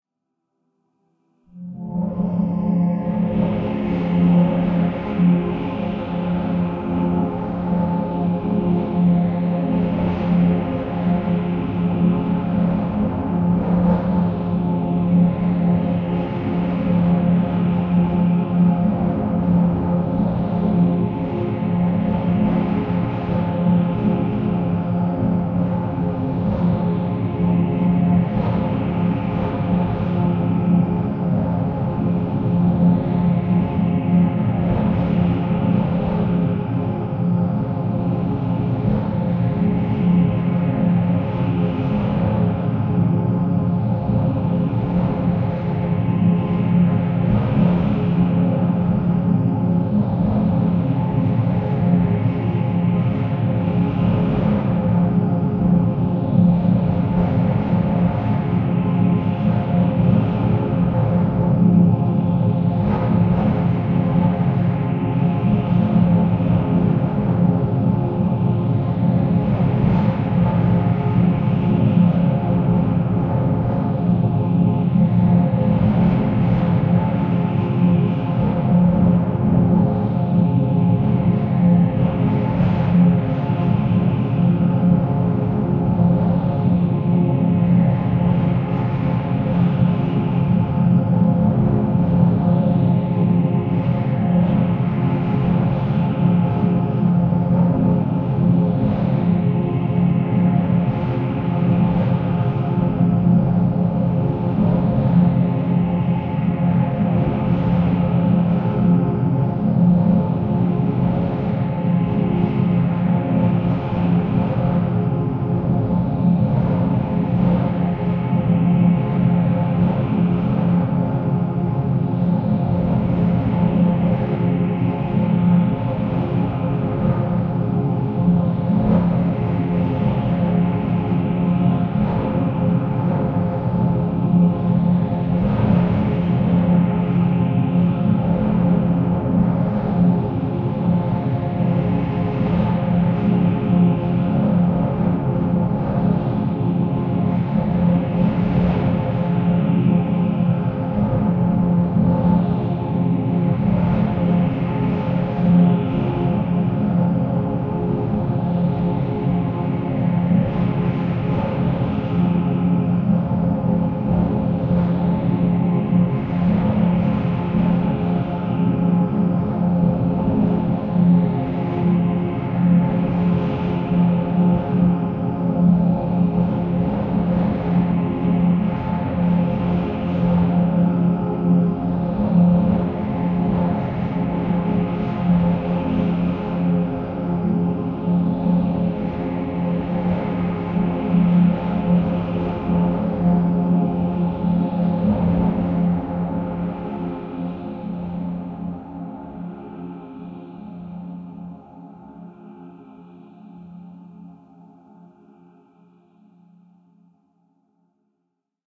LAYERS 017 - MOTORCYCLE DOOM 2-55
LAYERS 017 - MOTORCYCLE DOOM 2 builds further on LAYERS 017 - MOTORCYCLE DOOM. It is this sound mixed with a self created pad sound from the Discovery Pro VST synth with a Detroit like sound but this sound is processed quite heavily afterwards: first mutilation is done with NI Spectral Delay, then some reverb was added (Nomad Blue Verb), and finally some deformation processing was applied form Quad Frohmage. To Spice everything even further some convolution from REVerence was added. The result is a heavy lightly distorted pad sound with a drone like background. Sampled on every key of the keyboard and over 3 minutes long for each sample, so no looping is needed. Please note that the sample numbering for this package starts at number 2 and goes on till 129.
experimental, evolving, drone, multisample, soundscape, artificial